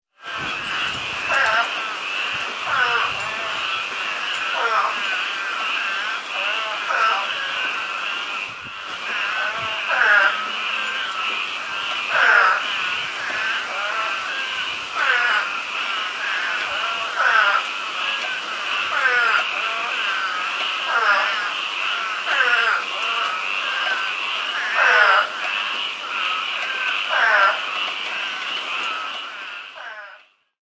frogs in the yard 2015
Rain and frogs recorded from my back porch May 10, 2015 with Zoom H4.
nature, rain, toad, night, croak, frog